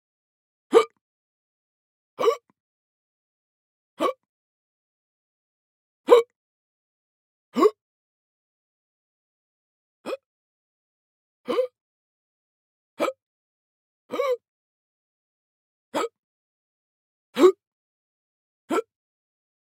male hiccup
male fake hiccups